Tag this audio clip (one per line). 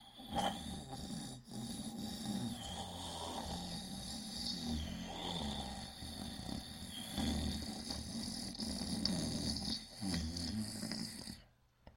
snore
vocal